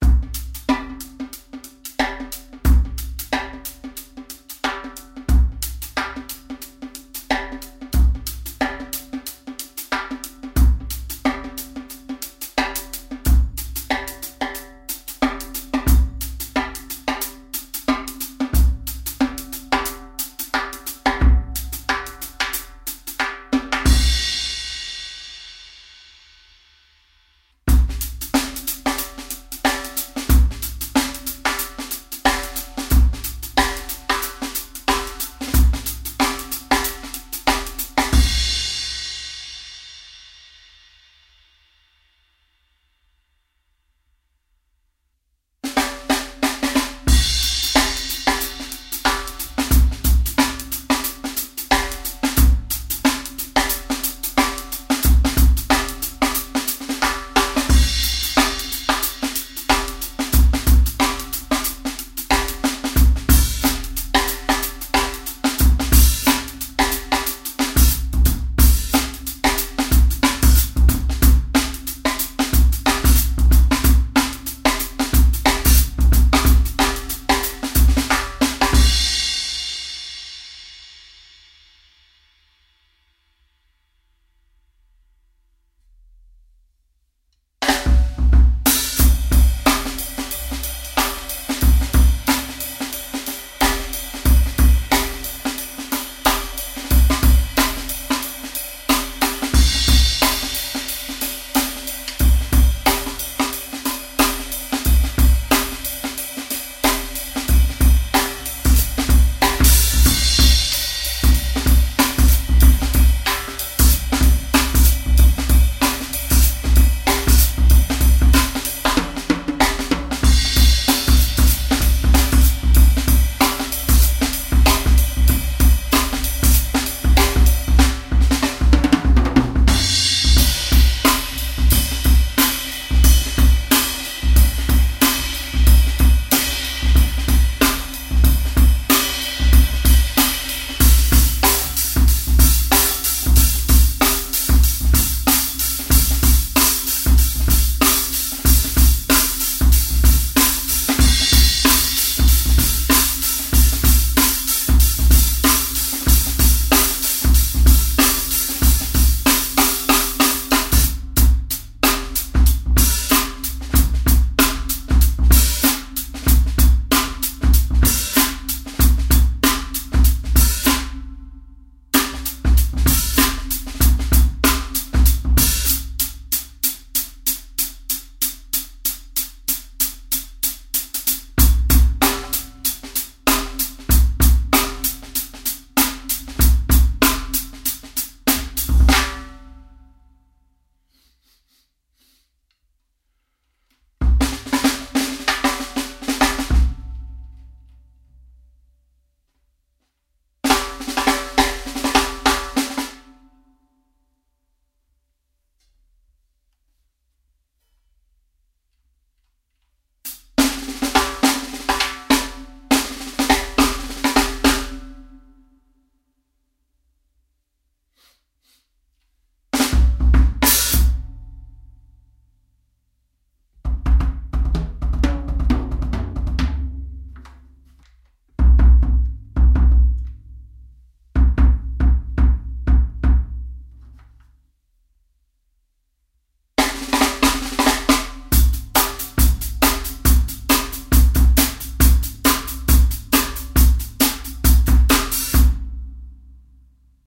Hip Hop Kit beats
Some shuffle hip hip hop drum beats I played on my Gretsch Catalina Jazz kit. Mapex Fastback 12" snare drum. Added some oomph to the kick. Some fills in the end.
effects, hip, loop, shuffle, drums, hop, improvised, beats, funky